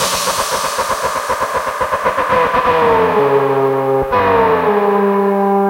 The Beast Ringtone was created with no-input-mixing-desk feedback and a synth drum. It sounds very organic though created with a controlled and modulated feedback "noise".
All "no-input-mixing-desk" sounds were created by DMCQ (Anunusalleopard / Apollo59)